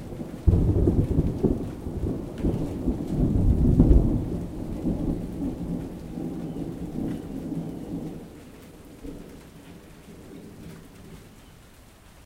Lightning strikes and makes thunder out on the patio.
lightning, ambience, thunder, patio